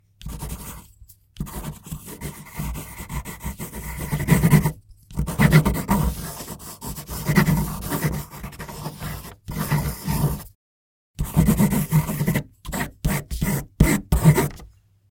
Pencil on paper scribbling.
Recorded with H5 Zoom with NTG-3 mic.